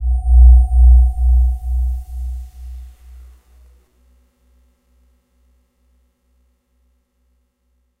Not that is was that important after all considering the fact that the patch itself has a grainy character in the higher frequencies... No compressing, equalizing whatsoever involved, the panning is pretty wide tho, with left and right sounding rather different, but in stereo it still feels pretty balanced i think.